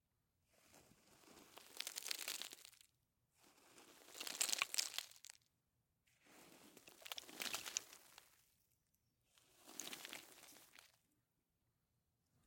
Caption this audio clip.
Dirt Slide 01
Light dirt rolling down a slope.
Rode M3 > Marantz PMD661